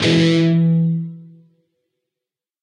Dist Chr Emj rock up pm
A (5th) string 7th fret, D (4th) string 6th fret. Up strum. Palm muted.